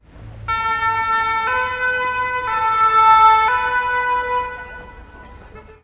Parisian fire truck siren
A Parisian fire truck passes about 150 feet away and briefly turns on its siren to make sure nobody overlooks it.
Emergency vehicles in France have either two-tone or three-tone sirens. Vehicles to which one must yield the right of way, such as fire trucks, use the two-tone siren. The three-tone siren (not on this recording) is used for vehicles that do not require drivers to yield but should still be given some priority.
horn, fire, siren, French, paris, france, fire-truck